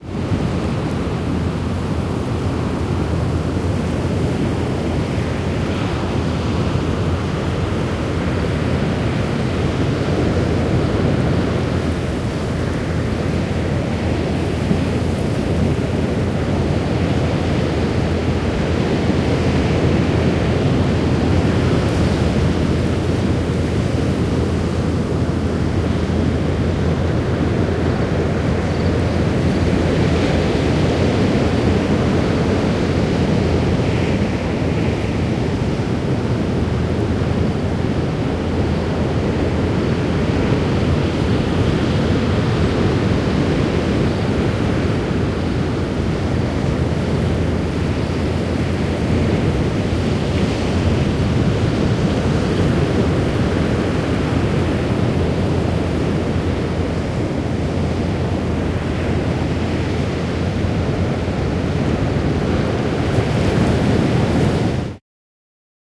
Wind at Ocean shore.
This is the sound of wind at the ocean shore. Recorded in stereo with a Sony PCM-D100.
ocean, Sony-PCM-D100, wind, seaside, beach, wave, coast, water, field-recording, coastal, shore, sea, surf, windy